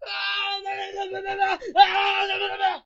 AHHHHHHH GIBBERISH
Me freaking out in my headset. You should have seen my family's reactions. They laughed their a** off.